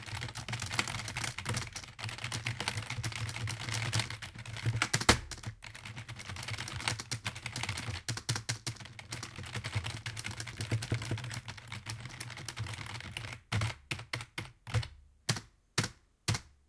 Typing External Keyboard 1
Computer, H1, Keyboard, Stereo, Typing, Zoom